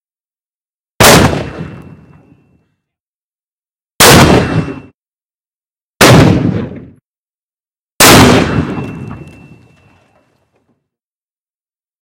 Mortar Shots
Explosive mortar shell shooting.
firing, war, gun, shoot, army, soldier, killing, shooter, military, live-fire, fire, attack, shooting, kill, weapon, first-person-shooter, technology, mortar, warfare, fps